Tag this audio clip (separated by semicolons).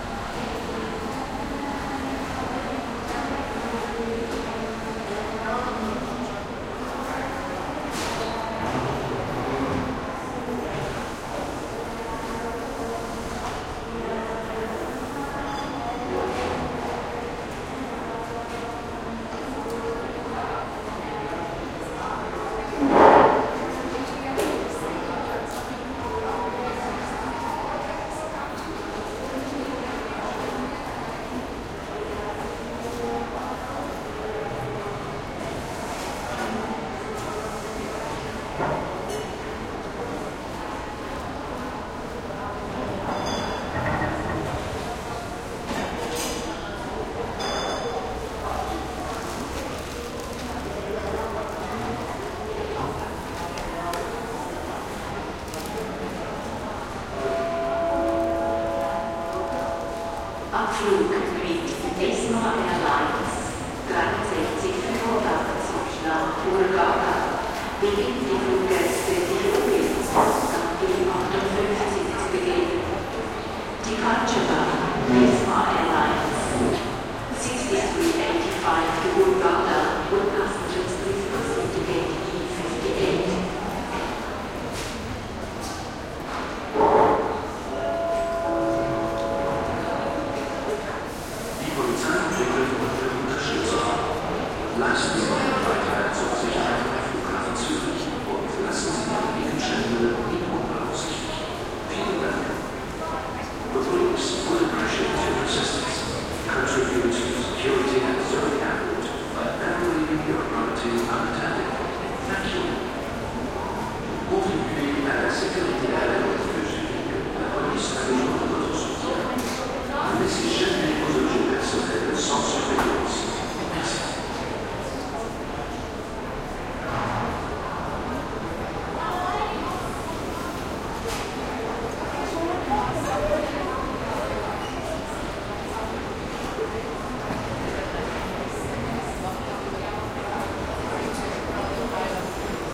PA; Zurich; airport; announcement; empty; terminal